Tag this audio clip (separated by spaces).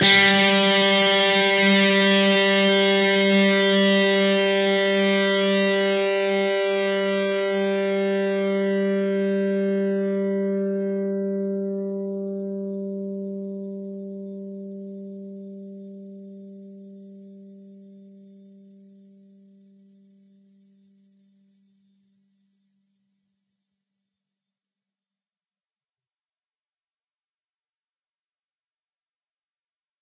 distorted distorted-guitar distortion guitar guitar-notes single single-notes strings